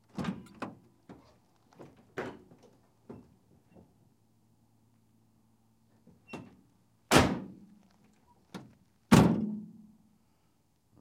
Van rear doors
Rear side-by-side doors opened and closed.
automotive, auto, van, car, door, truck